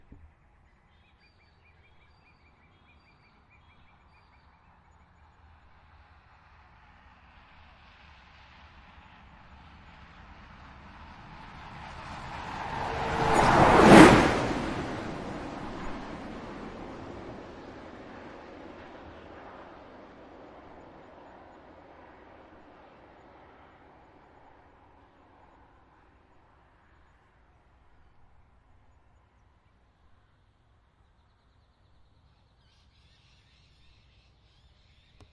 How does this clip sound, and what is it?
Recording of Obhan bus going past on tracks. Really great dopplar effect.